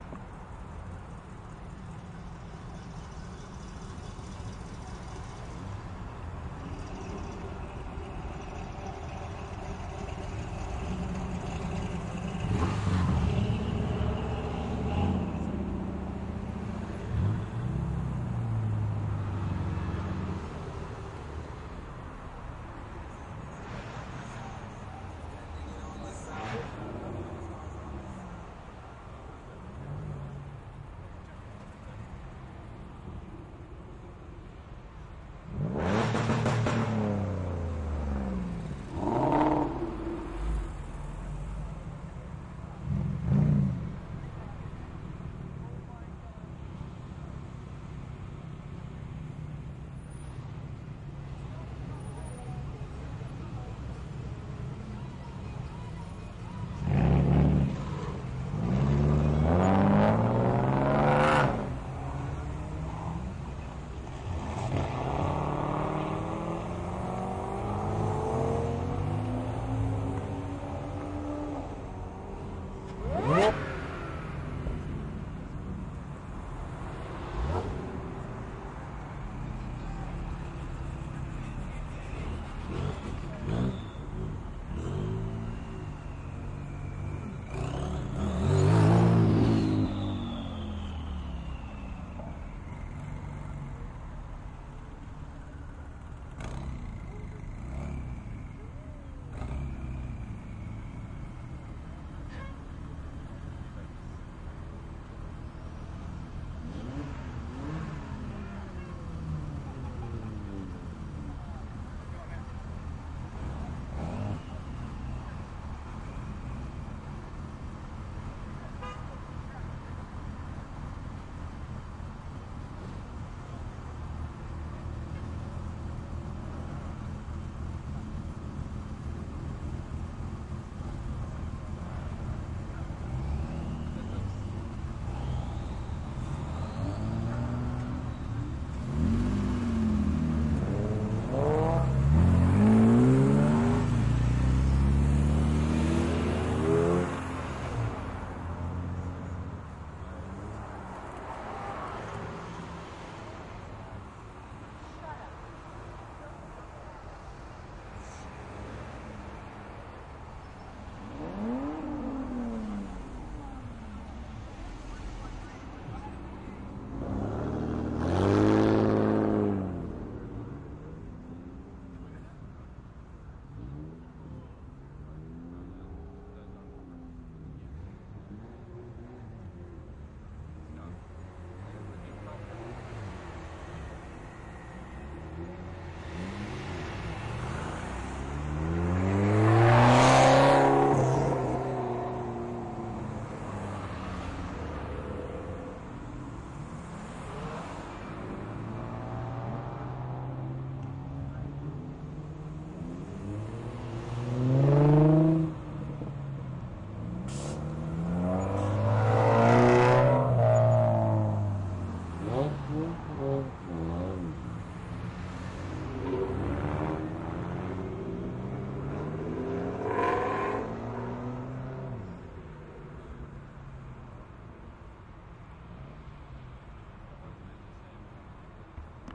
Carshow-cars-revs-crowd-008
Outdoor recording of cars going by at a car show. Some crowd and ambience with engine revs. Recorded with onboard mics of a Tascam DR-07 with a Rode Dead Kitten over it.
ambience, automobile, car, crowd, engine, field-recording, outdoors, people, revs, show, vehicle